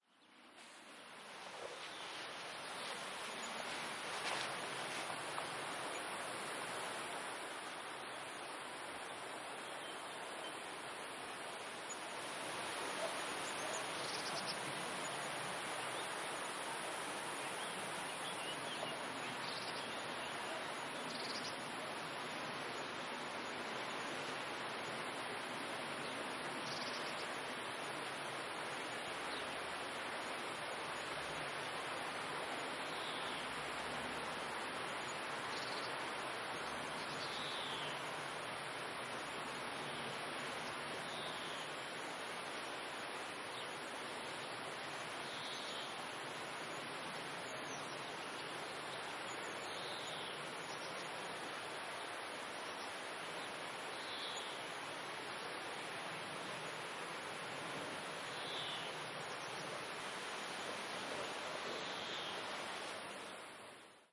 on the river obra przyprostynia 19072013 001
19.07.2013: fieldrecording from Przyprostynia village (district Zbaszyn, powiat Nowy Tomysl, Wielkopolska region, Poland). Sounds of countryside meadow located on the river Obra. Recorded during ethnographic research conducted for The National Museum of Agriculture in Szreniawa (project Atlas of Nonmaterial Cultural Heritage of village in Wielkopolska Region).
marantz PDM661MKII + shure vp88